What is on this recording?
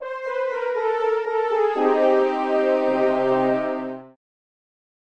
A sad jingle, signalizing you lost a game.
jingle, game, sad, lost